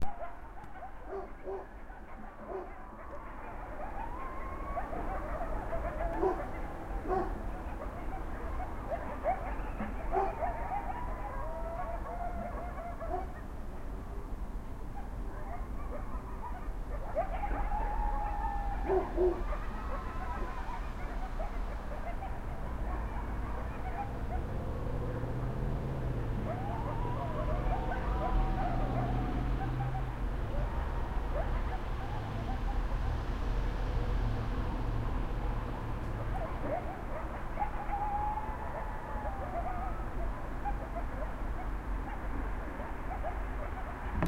City night, dogs barking from distance